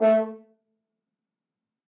One-shot from Versilian Studios Chamber Orchestra 2: Community Edition sampling project.
Instrument family: Brass
Instrument: F Horn
Articulation: staccato
Note: A3
Midi note: 57
Midi velocity (center): 42063
Microphone: 2x Rode NT1-A spaced pair, 1 AT Pro 37 overhead, 1 sE2200aII close
Performer: M. Oprean
brass, multisample, a3, f-horn, staccato, midi-note-57, midi-velocity-105, vsco-2, single-note